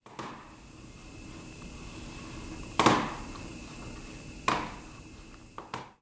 I use a computer mouse and drag it and drop it on a desk.
computer, mouse, MTC500-M002-s13